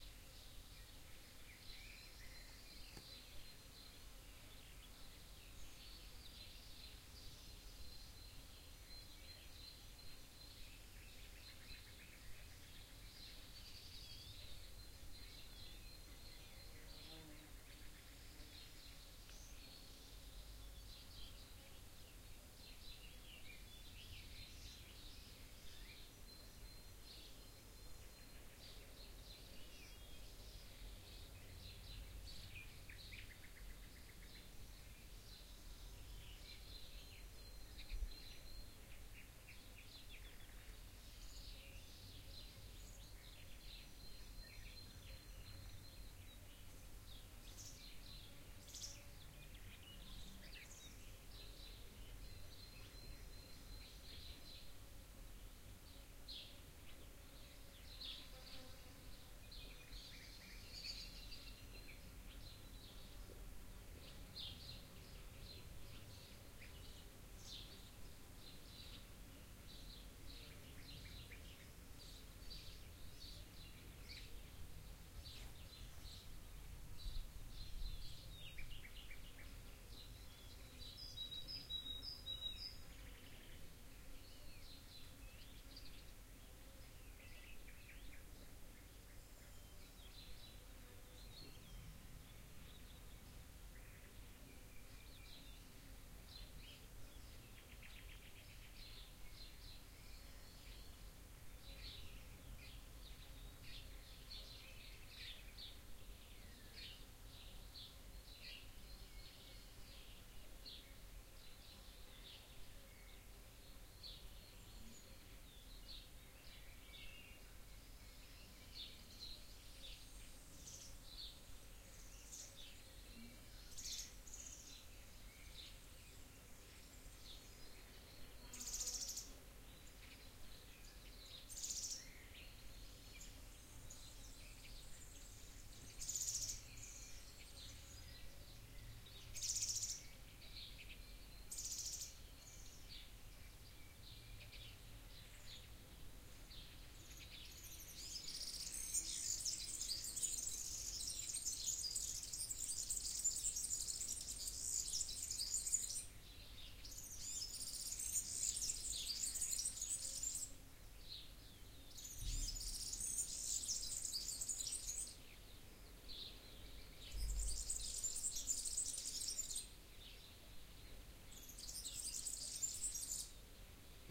Binaural field-recording from the birds and flies singing in a rural environment in Catalonia
catalonia, zoom